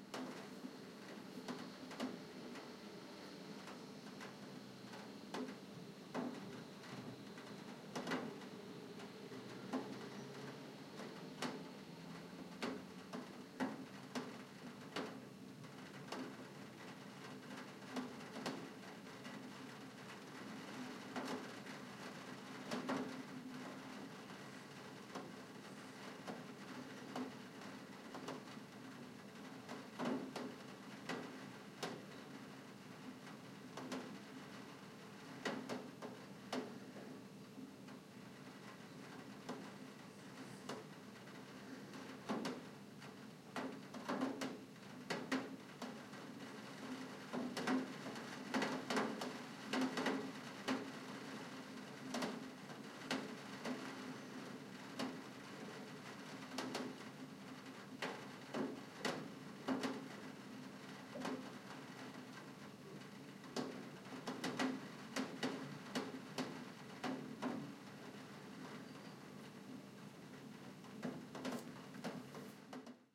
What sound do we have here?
Raindrops on window sill 2
drops, dripping, weather, raining, raindrops, windowsill, window, droplets, ambience, rain, nature